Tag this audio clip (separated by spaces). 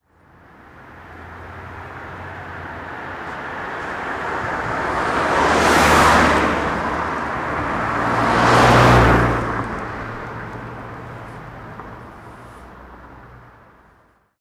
pass passing